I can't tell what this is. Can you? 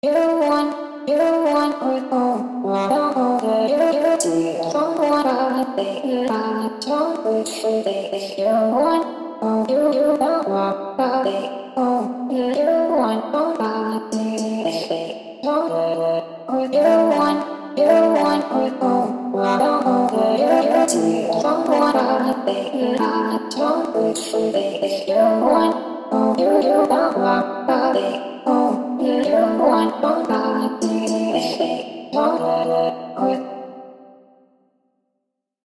Vocal Chops, Female, with Harmony
Vocal Chops I sung and made for my new song. Loops twice, the second time with harmony. Female voice, heavily processed and auto-tuned. 115 bpm.
115-bpm, Autotune, Chops, Compressed, Female, Harmony, Melody, Pitch, Reverb, Tuned, Vocal, Voice, Vox